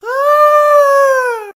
Strange Male Scream
Male screaming in a strange way. Recorded using a Rode NT2-a microphone.
Shouting, 666moviescreams